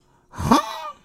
"huh" trap chant
box, hip, hoop, percussion, rap